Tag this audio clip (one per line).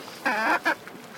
animal
Chicken
buck
farm